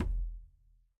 Wooden Junk Kick (deeper)
Big wooden box. Used as a kick drum.
junk, kagge, kick, basskick, baskagge, tom, drum